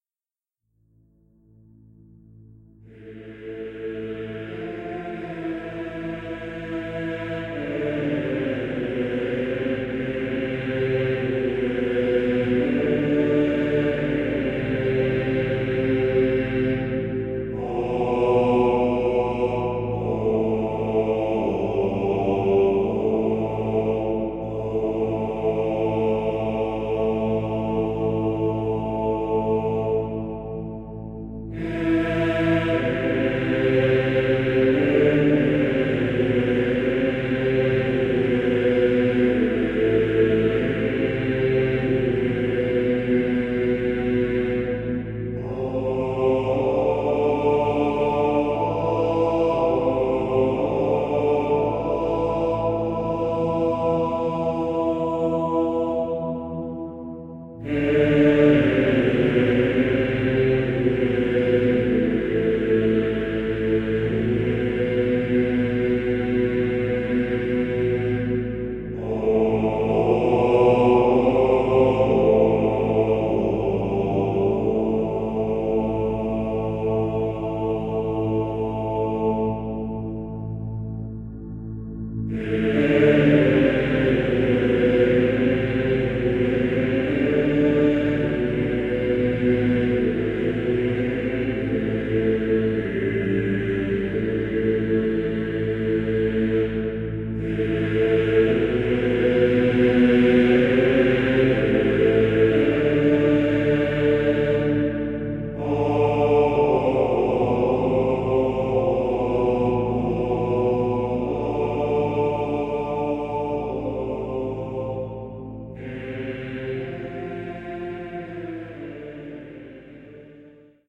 Musica de ambiente
Audio, Clase, Tarea